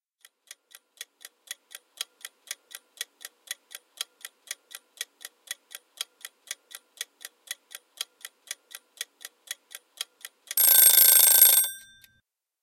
Kitchen Timer
Recording of a kitchen cooking timer. Ticking and then the ringing bell.
Kitchen, Timer, Ding, Bell